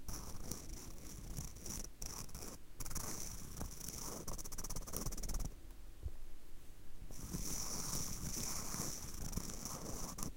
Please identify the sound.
Pulling dental floss out of a metal container. Recorded with AT4021s into a Modified Marantz PMD661.
scrape, small, whir